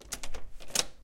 door latch open
A heavy fire door being opened- plenty of latch opening noise